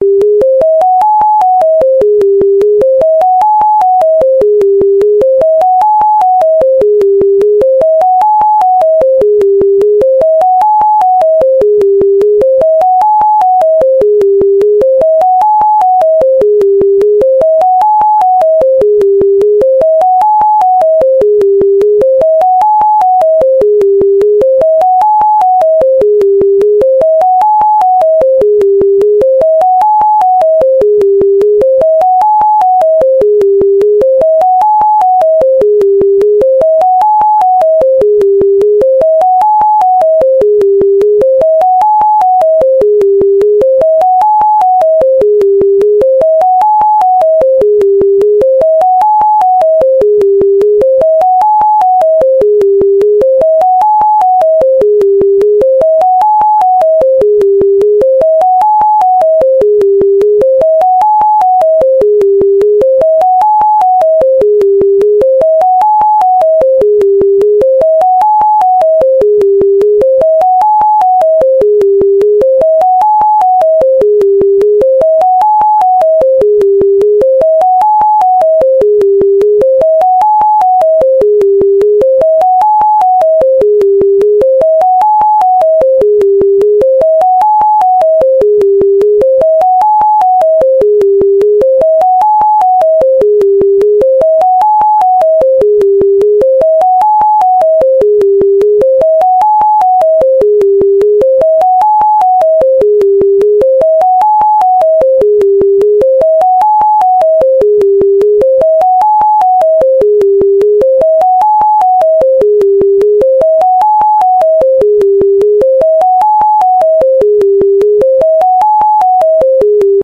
Created using Audacity
200ms intervals